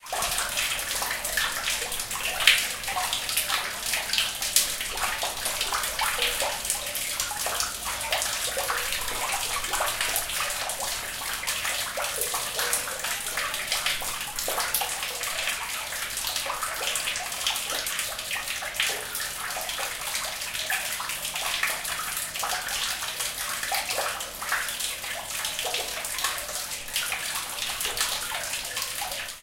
drain - normalized - trimmed
Sound of water in a drain. Normalized, trimmed and fade-in/-out added.
drain, water, field-recording, ambience